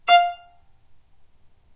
violin spiccato F4
spiccato, violin